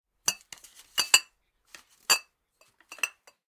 Some bottles moving.
Animation, SFX, Hit, Clink